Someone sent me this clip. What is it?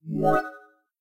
Teleport Casual
Quick teleport / interface event thingy